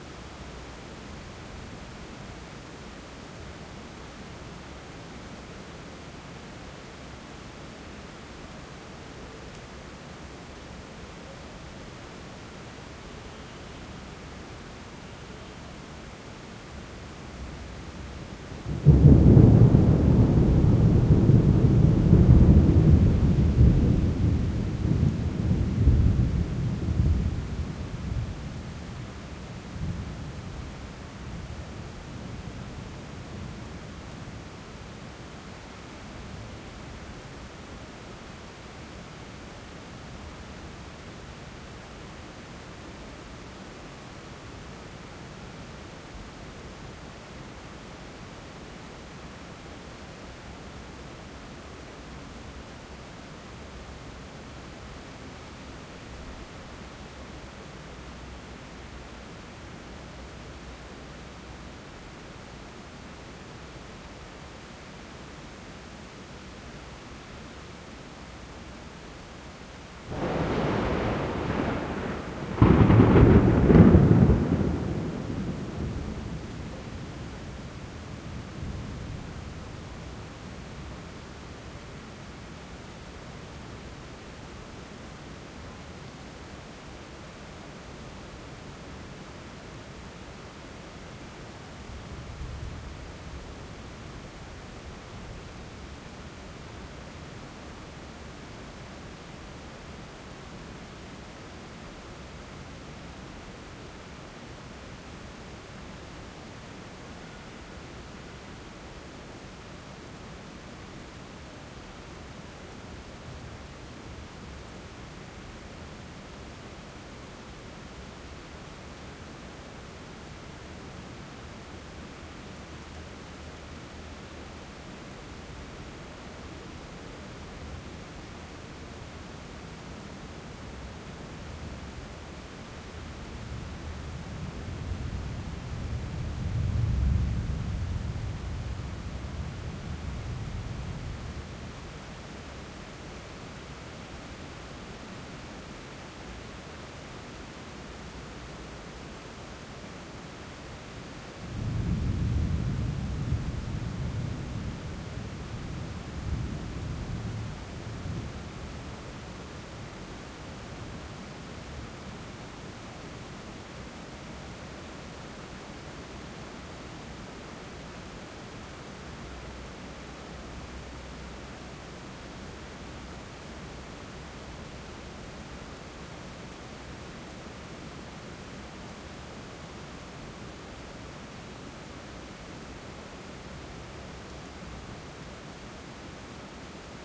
Thunder - Guangzhou - China - Harder rain
Rain and thunder recorded in Guangzhou, China, 2014.
canton, china, guangzhou, rain, thunder